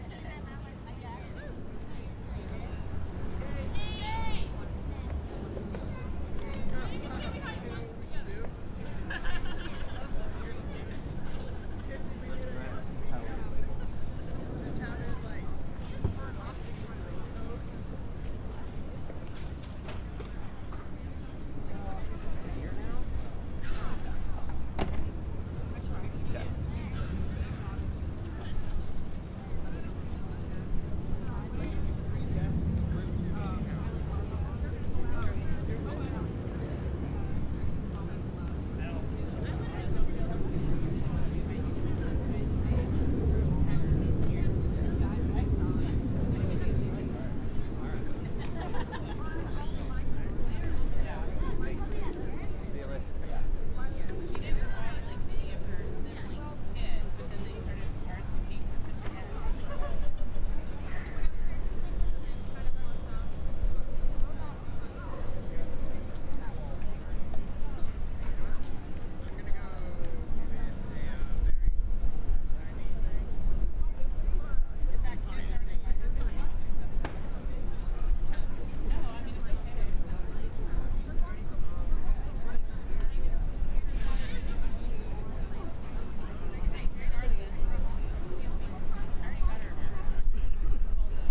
City Park Portlan Oregon Airplane (Noise Growingin BG)
This is a recording of a city park in downtown Portland, Oregon. There are a lot of hard/cement surfaces. (A lot of red bricks)
An airplane engine creeps in throughout the track.